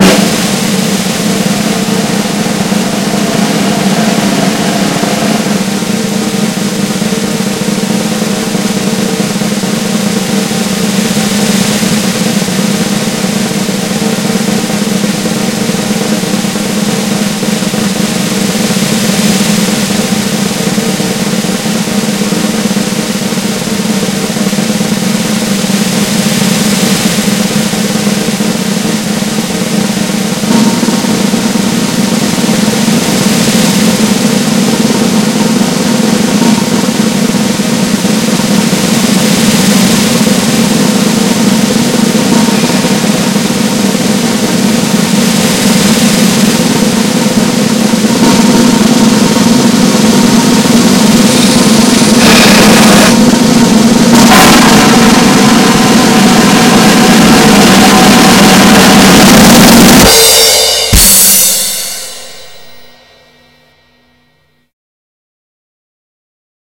long-drum-roll-for-ceremony
There can be maximum 30 tags, please select the most relevant ones!
For requesting, here's the remixed long drum roll. 60 seconds.
I added sources for this sound.
Sound ID is: 569112
Bobby-Morganstein, ceremony, drum, Octagonapus, roll, snare, Sonic